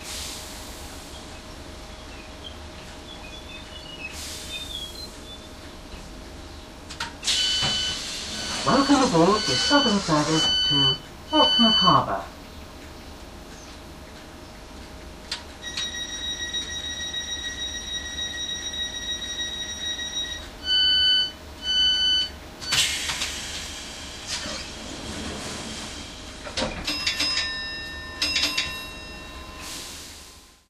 Train Service to Portsmouth
The doors of a train opening and closing at a station.
Train, Travel, Announcement